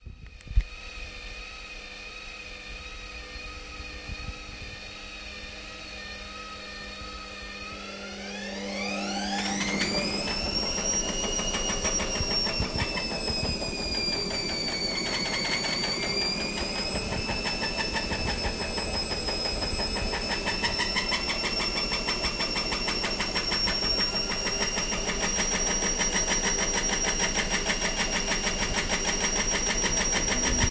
A washing machine with a squeaky bearing during a spin cycle